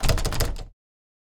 Door Handle Resistance
Recording of a door handle being rattled like it won't open. This is a dry version of a sound to be used for a PC game. Kam i2 into a Zoom H4N.